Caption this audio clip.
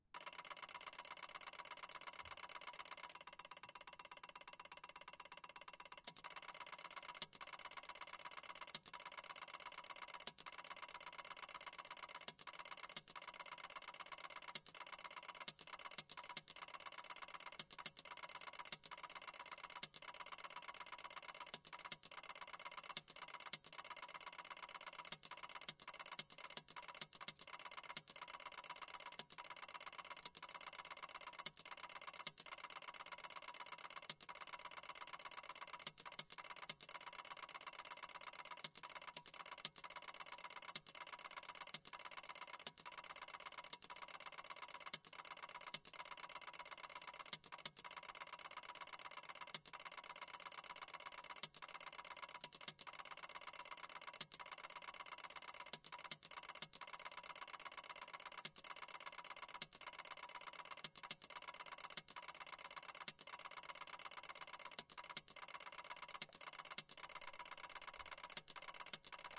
soft sporadic ticks interspersed in constant ticking